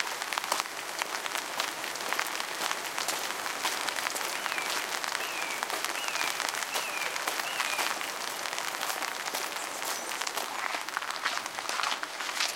walking in the rain
An ambient element made up of walking and rain recordings
soundscape, ambient, composite-sound, elements, nature, sound-effects, ambience, water, rain, soundscapes, ambiance, walking